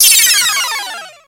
sfx-downward-5
Made with a KORG minilogue